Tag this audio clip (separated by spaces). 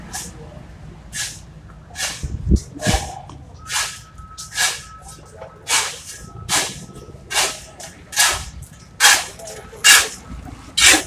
06-obrero 1 No Of Paisaje Palmira Proyect SIAS Sonoro Sounds Soundscape Toma